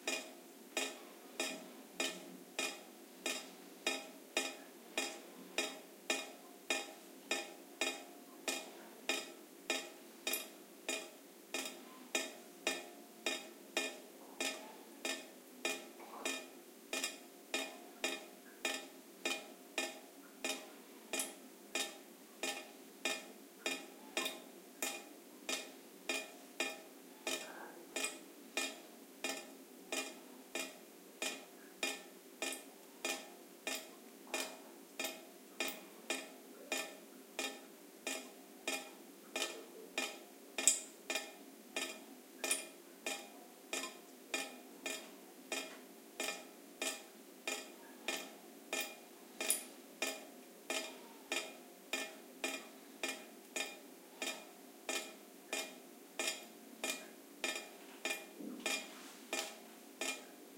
20110924 dripping.stereo.13
dripping sound. AT BP4025, Shure FP24 preamp, PCM M10 recorder